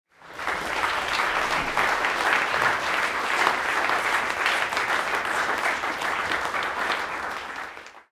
A group of people applauding.
group, applaud, clapping, crowd, happy, audience